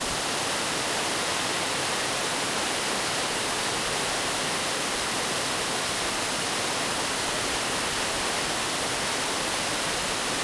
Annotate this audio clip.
Waterfall, Large, A
(Small warning to headphone users)
A quick and raw recording of an artificial waterfall in Guildford. You can loop this for however long you require.
An example of how you might credit is by putting this in the description/credits:
The sound was recorded using a "H1 Zoom recorder" on 1st February 2016.
water, waterfall, large, fall